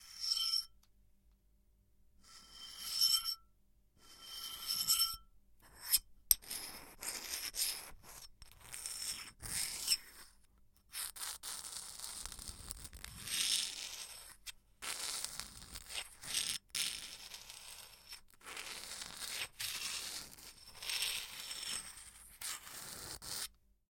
Scratching metal on porcelain. Closel mic. Studio.
metal, horror, Scratching